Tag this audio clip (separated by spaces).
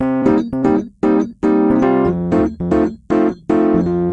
116 rhodes